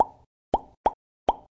4 Pop in a row
Pop sound in mic
funny, mouth, sound, 4, effect, mic, sfx, noise, popup, fun, pop, voice, fx, up, pops